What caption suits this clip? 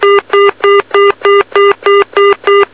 BT Pay Tone
bt, tone